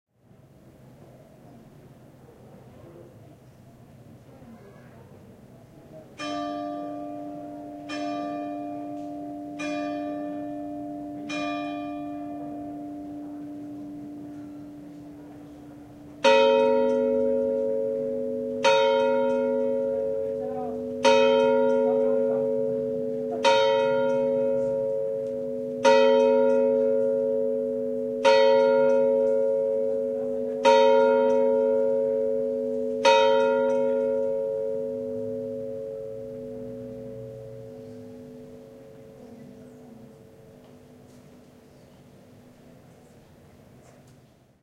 bells pselva 1
Church bells from Port de la Selva (village near Cadaqués, Catalonia). Time: 20 hours. Recorded with MD Sony MZ-R30 & ECM-929LT microphone.